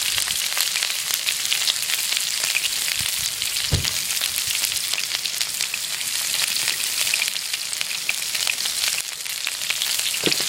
Frying some sausages on a stove.